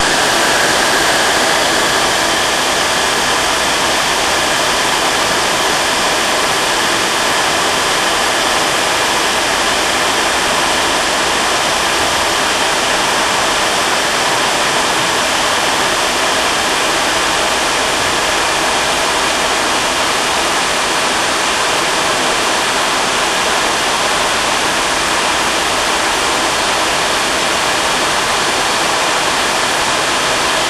What was to be part of my "Sounds Cats Hate" sample pack, these snippets of a vacuum cleaner are sure to annoy cats, dogs and some birds. Enjoy.
cleaner, vacuum